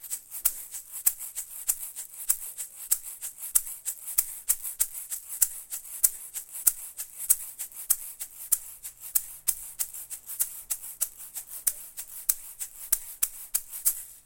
A groove done on an egg shaker with an open hand.